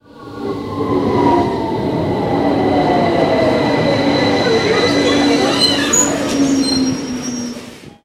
London Underground- train pulling into the station
A Victoria Line train arrives at Oxford Circus tube station, slowing down, squeaky brakes. Recorded 19th Feb 2015 with 4th-gen iPod touch. Edited with Audacity.